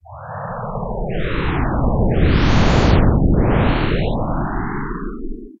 [AudioPaint] seastar
Created with AudioPaint from image of a seastar/flower-like thing.